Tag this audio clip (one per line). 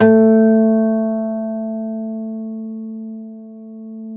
velocity
guitar
multisample
1-shot
acoustic